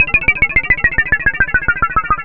ambienta-soundtrack moog-sweep pt03-down
used intensively in the final chapter of "Ambienta" soundtrack! i'm proud enough of this sound that I have tried to design and re-produce for along time till obtaining a satisfactory result (i realized the square waveform was the key!!). it's a classic moog sweep you can ear in many many oldschool and contemporary tunes (LCD Soundsystem "Disco Infiltrator"; Luke Vibert "Homewerks"; Beck "Medley of Vultures" ..just to make a few examples). sound was bounced as a long sweep, then sliced as 6 separate perfectly loopable files to fit better mixes of different tempos: first 2 files is pitching up, pt 2 and 3 are pitching down, last 2 files are 2 tails pitching down. Hope you will enjoy and make some good use (if you do, please let me ear ;)
abstract, analog, analogue, cinematic, classic, contemporary, effect, electro, electronic, falling, filters, fx, lead, moog, oldschool, pitch-bend, rise-up, rising, scoring, sound-effect, soundesign, soundtrack, space, square-waveform, sweep, synth, synthesizer, theatre